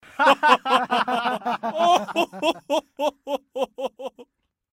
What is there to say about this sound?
Rindo alto, três pessoas rindo. Gravado em estudio, microfone shotgun.
Gravado para a disciplina de Captação e Edição de Áudio do curso Rádio, TV e Internet, Universidade Anhembi Morumbi. São Paulo-SP. Brasil.
Laughing, alto, rindo, Anhembi, hahaha, hohoho, much, muito